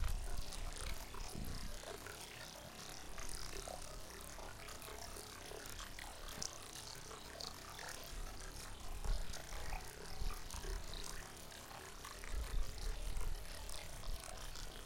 Stream with Pitch Change
A recording of a steam using the Zoom H6 with the included XY mic and a Shure SM58 with edited pitch to try to make it sound more mechanical.
nature, stereo, stream